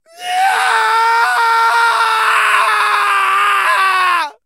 Long male scream 1
Long male scream expressing extreme pain or sadness.
Recorded with Zoom H4n
shriek, agony, screech, scream, horror, vocal, torture, cry, pain, dismember, suffer, male, long, voice, sadness, yell, human, torment